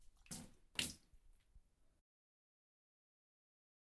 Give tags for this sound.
liquid; splash; water

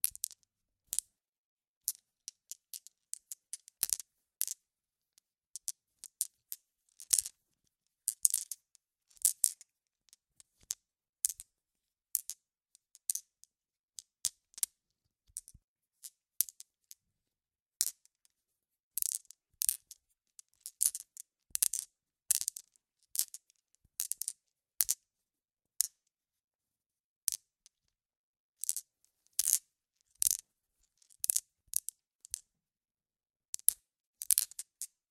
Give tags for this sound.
clicks; glass; marble; marbles; onesoundperday2018

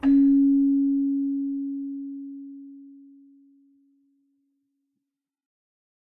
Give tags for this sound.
bell; celesta; chimes; keyboard

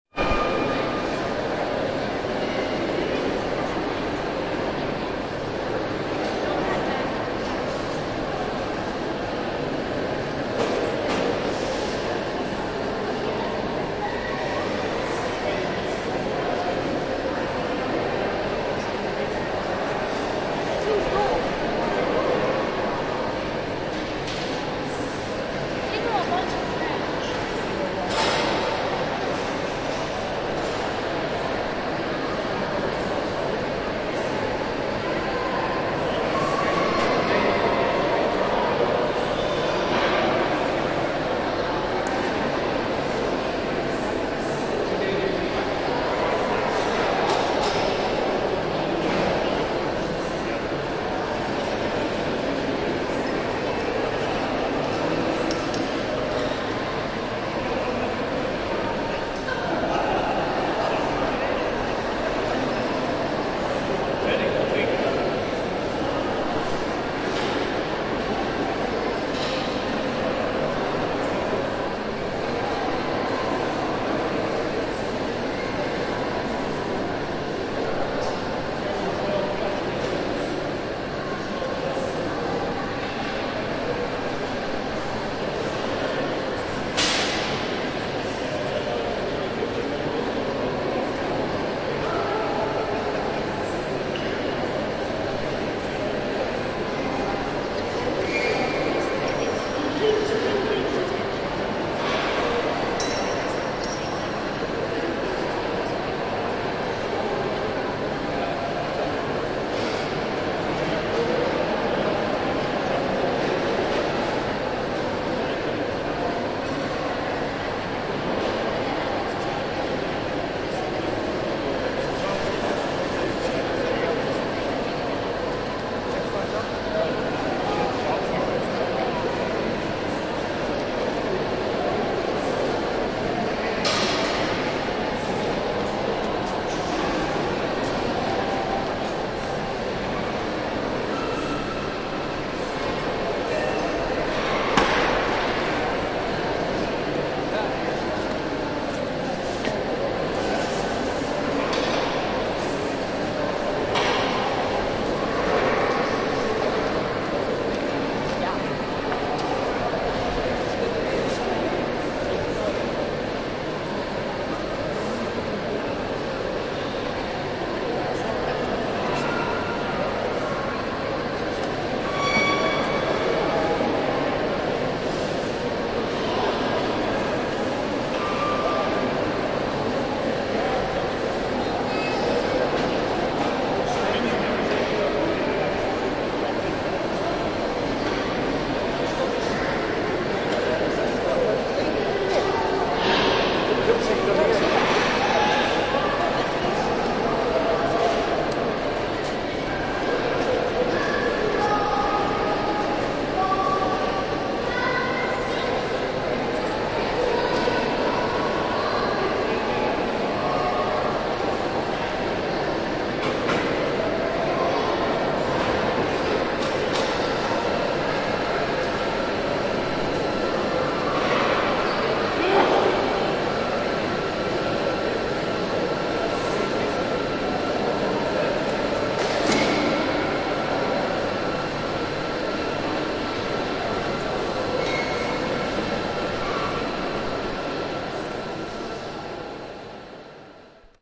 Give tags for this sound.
Experimental; Noise; Ambient

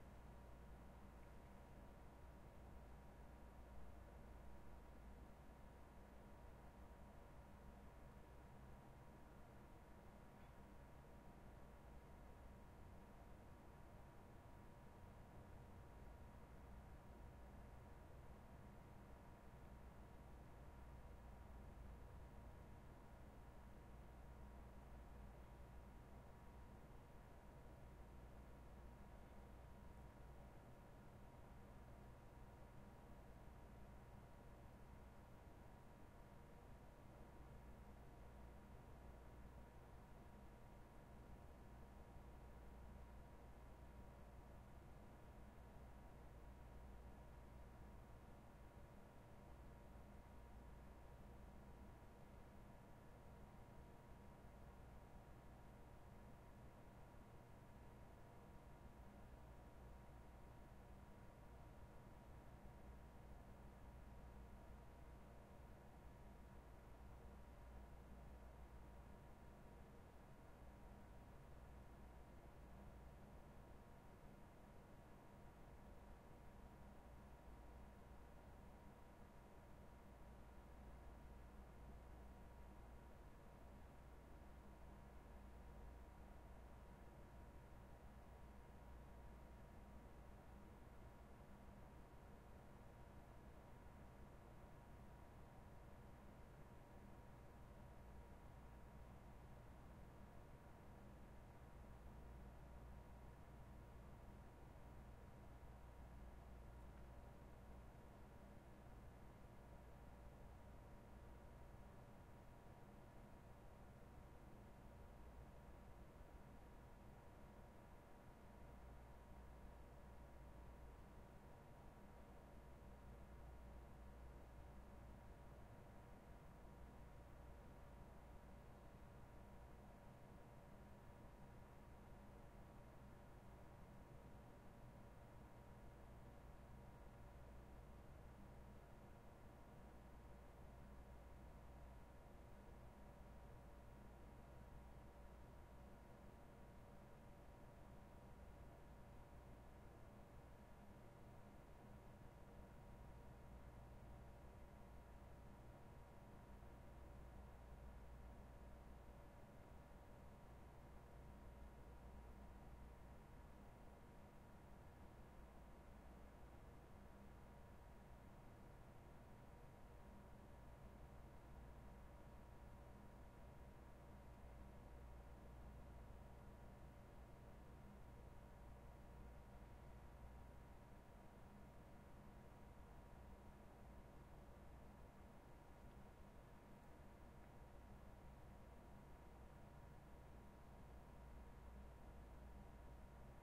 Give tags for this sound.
warehouse; fans

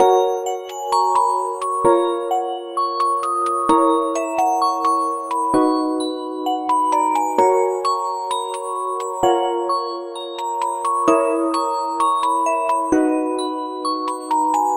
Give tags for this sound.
130bpm; 8bar; bell; celeste; childhood; electronic; glass; loop; loops; music; nostalgic; vibraphone

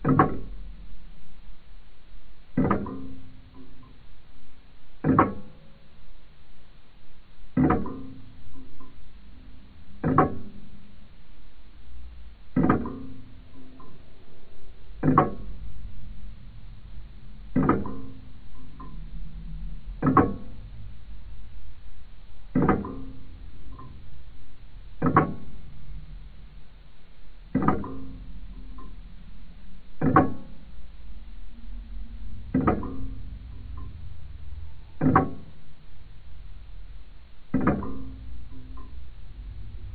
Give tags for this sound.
slow; tick; slowed; ticking; clockwork; clock; tick-tock

